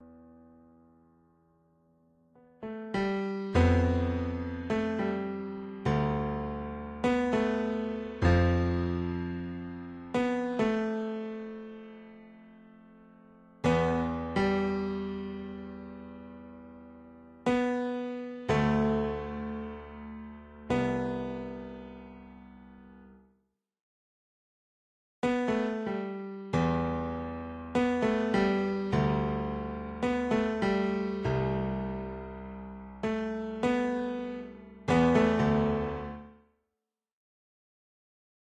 short piece of piano played in Garageband.